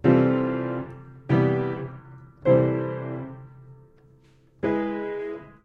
piano charge 8
Playing hard on the lower registers of an upright piano. Mics were about two feet away. Variations.
piano, play-hard, low-register, doom